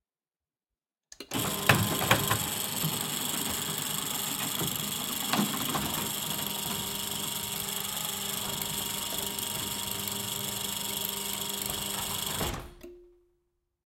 Electronic Gate Close 02
engine, gate, machine, motor, start